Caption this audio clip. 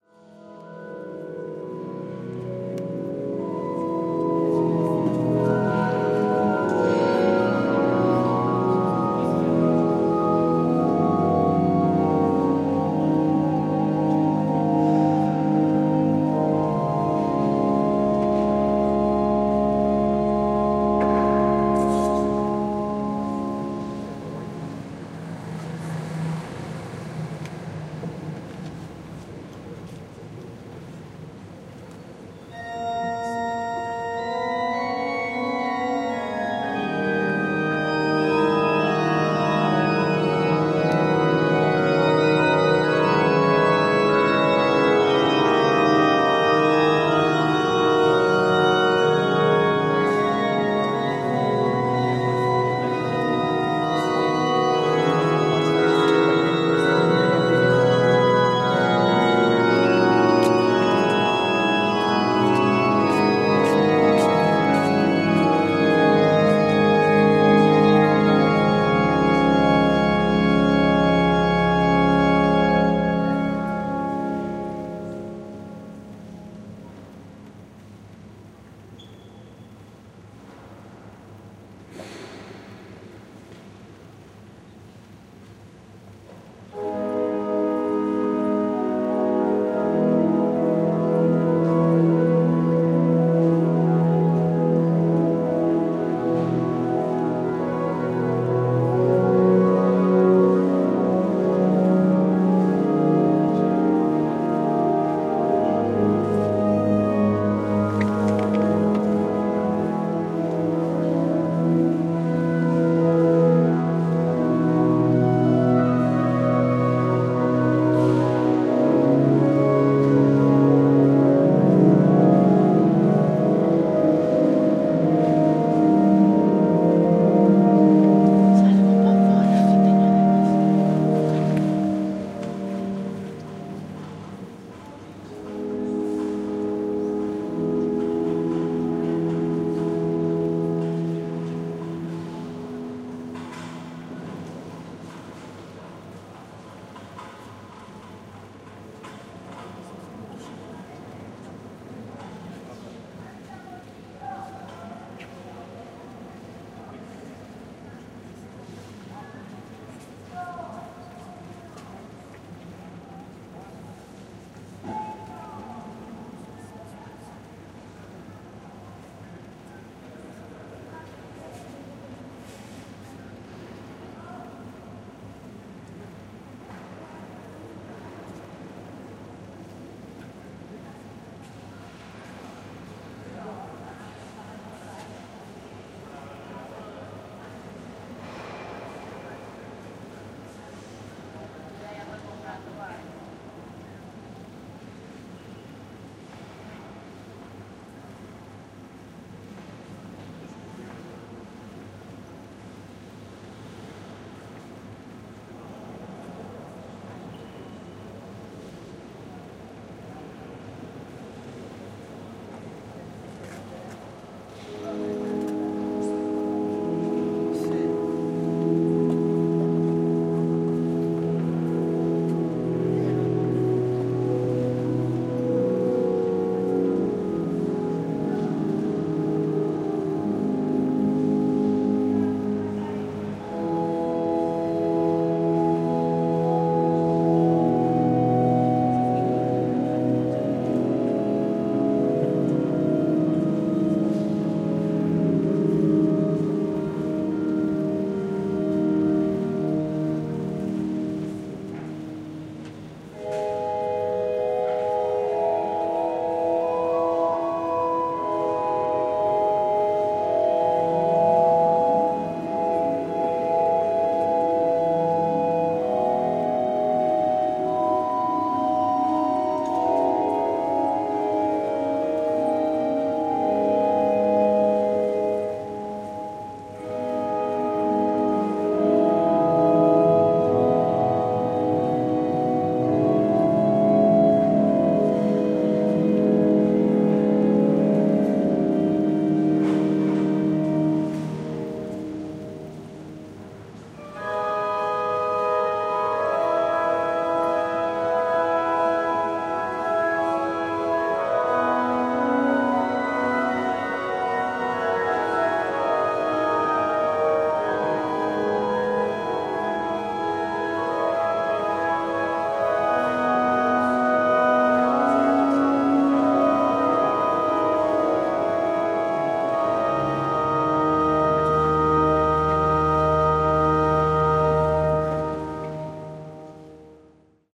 22 08 08-12 30-Iglesia con organo
The Gothic neighborhood is fulfilled with Churches. It’s not a strange thing to enter into one and find some organ’s master showing how to play to some of its pupils. At this recording we hear clearly the pupil playing different segments with the organ and, after the convenient master’s advices, play that segments one more time. We also hear people at the church speaking low, giving the soundscape a rumming background produced thanks to the big reverb of the church.
barcelona, organ, gotic, church